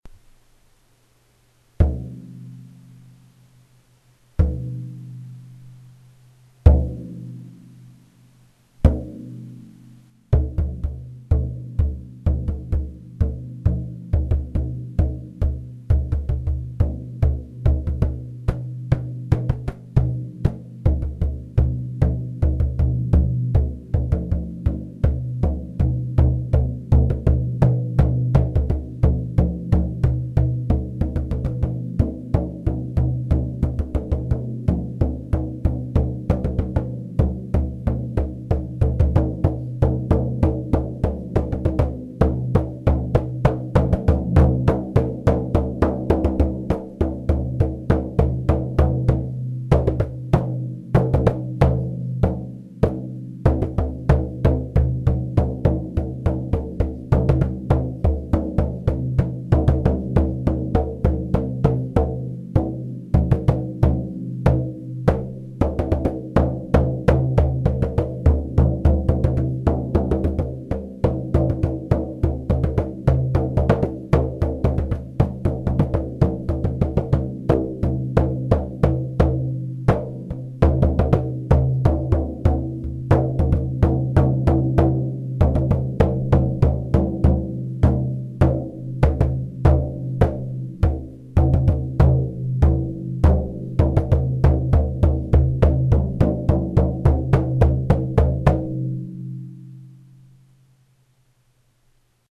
circular hand drum half volume
When I came to the strange decision to try recording my poems as songs I looked for ambience around the house. Silver tube inset in wood with mallet to create different singing bowl like effect